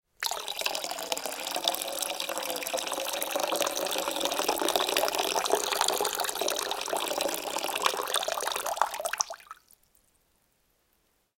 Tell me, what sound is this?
pouring water 2
Water being poured off into bucket with water, take two. Recorded with Oktava-102 mic and Behringer UB1202 mixer.
kitchen, water, pouring, drinks